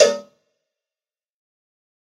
Dirty Tony Cowbell Mx 036
This is Tony's nasty cheap cowbell. The pack is conceived to be used with fruity's FPC, or any other drum machine or just in a electronic drumkit. ENJOY
cowbell, dirty, drum, drumkit, pack, realistic, tonys